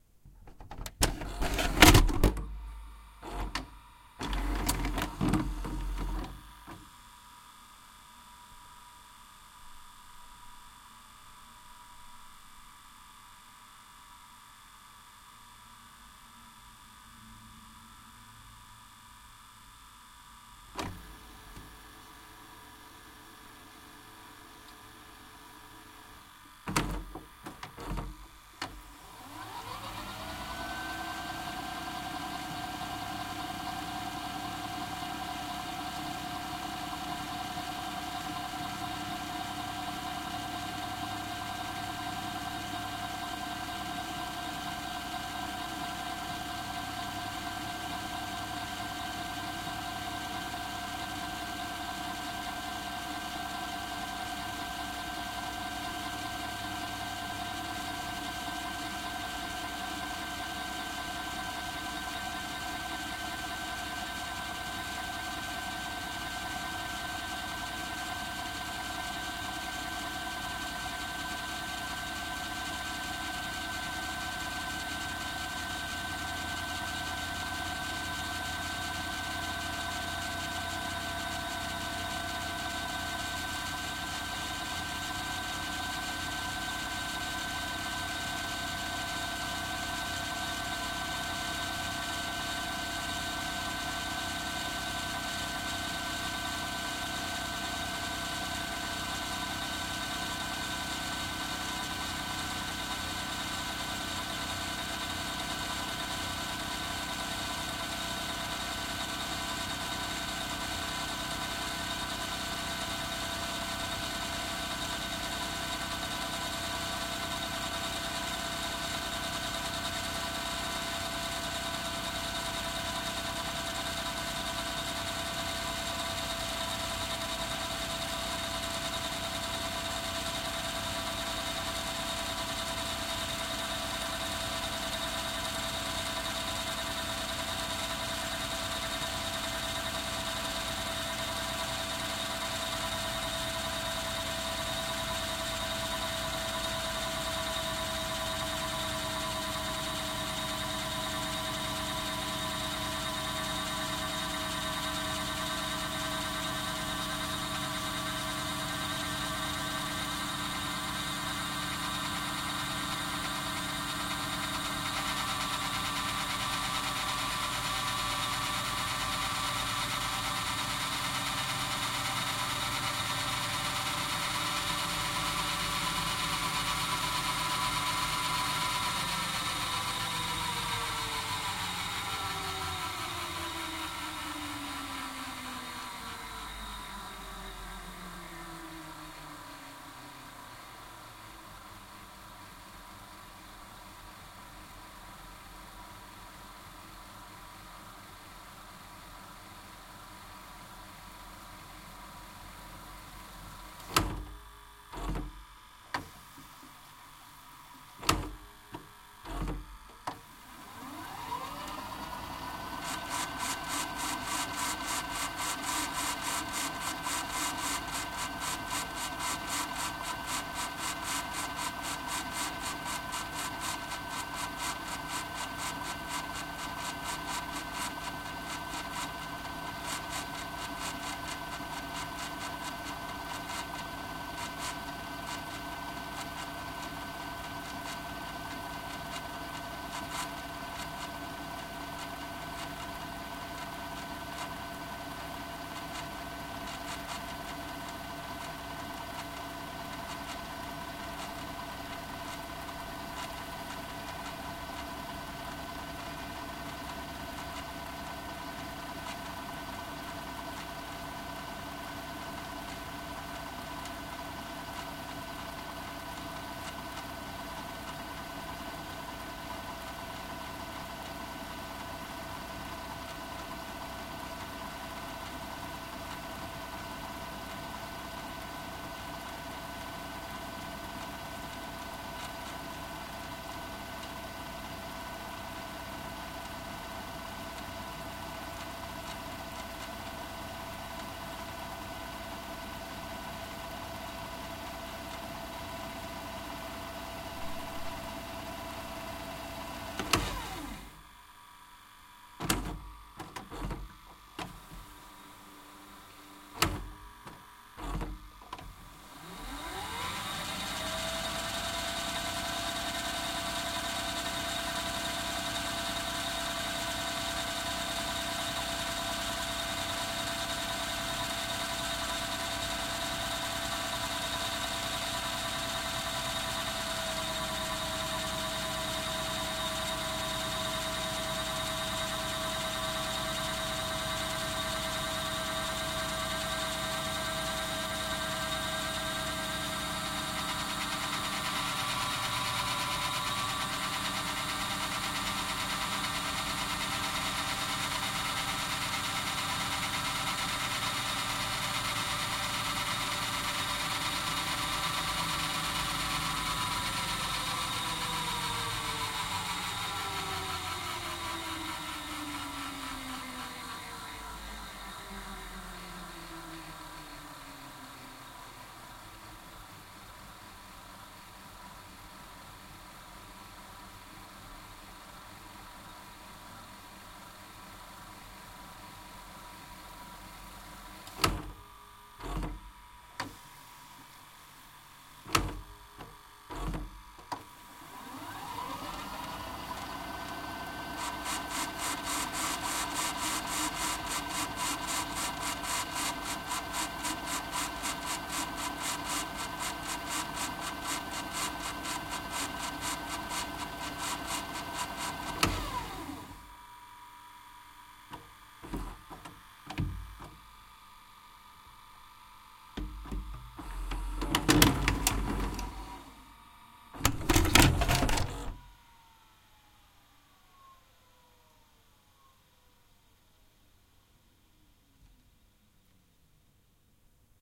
The sound of a working Aiwa CX-930 VHS VCR Video Cassette Recorder.
Recorded with Zoom H6.
loading, forward, electric, TV, fast, pause, DV, heads, VHS, recorder, click, rewind, television, machine, stop, tape, mechanical, sony, noise, VCR, 90s, cassette, player, minidv, digital, eject, button